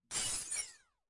FX - Laser Vent
FX Hits, Glitch, Game Sounds
Mic(s): Shure SM7B, Sennheiser MHK416
Source: Breaking Glass
Processing: Granular Modulation, Pitch & Time Stretch, EQ & Layering
mono, machine, game-sound, Granular, Glass, Robot, FX, electro, space, Glitch, hi-res, Sound-Design